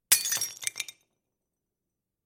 Breaking some old ugly plates and mugs on the floor.
Recorded with Zoom F4 and Sennheiser shotgun mic in a studio.